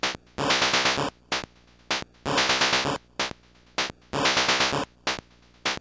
Nano Loop - Noise 5
I was playing around with the good ol gameboy.... SOmethinG to do on the lovely metro system here in SEA ttle_ Thats where I LoVe.....and Live..!
8bit, cheap, chiptunes, drumloops, gameboy, glitch, nanoloop, videogame